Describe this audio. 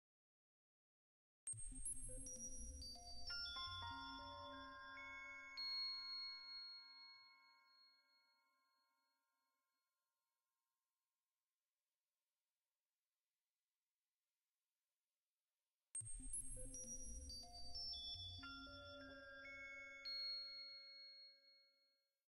overtone lir
some synth trying to emulate some harmonics, bell like dreaming atmosphere
ambiance, dreaming, harmonics, harmony, lindholm, overtone, overtones, synth